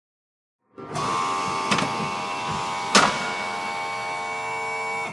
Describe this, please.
MITSUBISHI IMIEV electric car BRAKE mechanism
electric car BRAKE mechanism